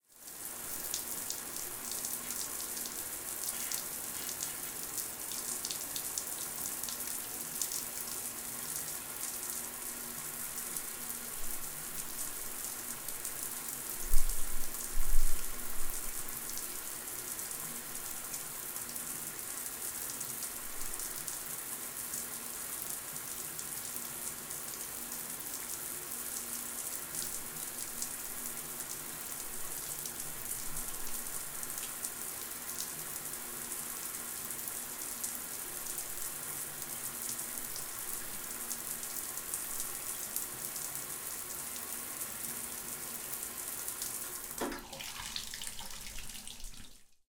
Mono recording of a shower running in a bathroom. Oktava MC-012 cardioid capsule straight to hardisk.
bath, field-recording, shower, shower-head, wash, water